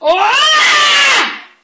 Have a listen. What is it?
woman scream
Woman fear scream recorded in the context of the Free Sound conference at UPF